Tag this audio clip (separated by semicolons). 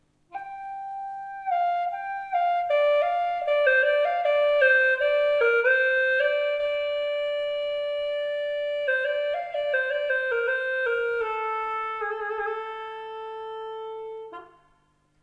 chinese; ethno; fltna; flute; gourd; music; yunnan